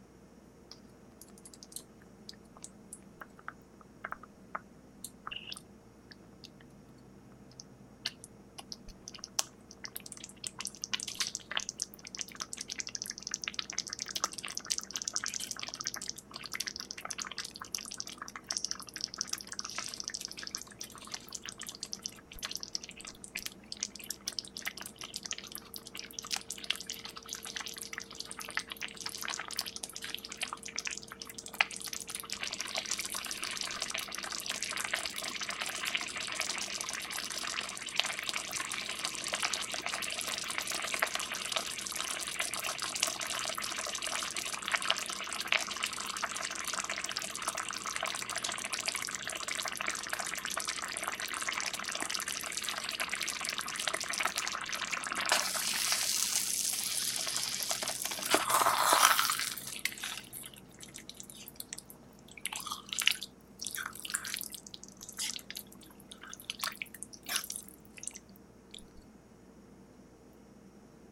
Stirring and simmering sauce on the stove. Tascam dr100 mkiii.
boiling, bubbling, cooking, hot, kitchen, sauce, simmering, stirring, stove, wet